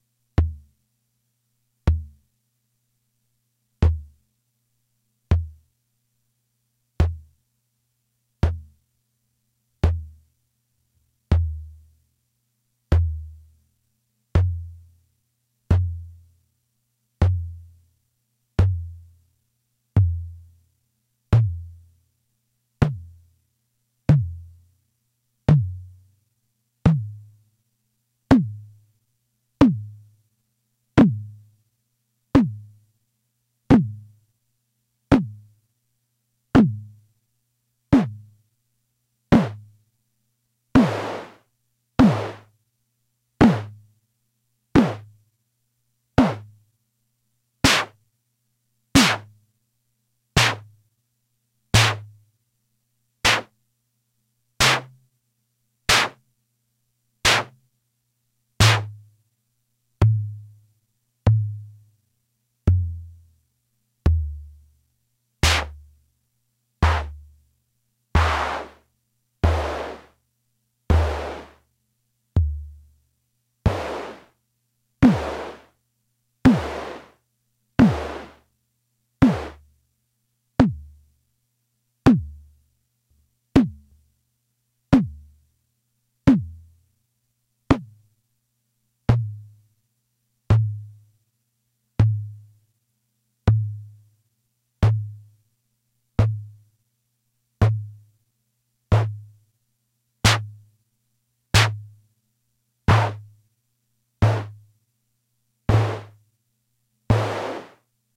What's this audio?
1983 Atlantex MPC analog Drum Machine tom drum sounds